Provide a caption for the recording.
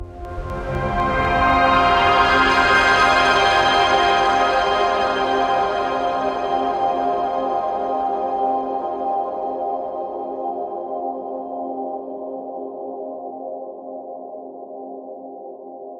KFA12 120BPM
A collection of pads and atmospheres created with an H4N Zoom Recorder and Ableton Live
soft, warm, electronica, distance, pad, spacey, chillwave, ambience, polyphonic, melodic, atmospheric, euphoric, chillout, calm, far